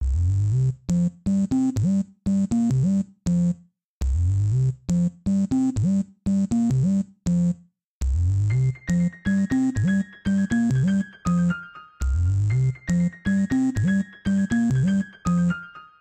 dramatic and minimalist theme with psychadelic salsa piano and some kind of sub dub bass
You can use the full version, just a piece of it or mix it up with 8 bar loopable chunks.
circus, danger, drama, dramatic, game, loopable, phantom, piano, retro, salsa, theme, video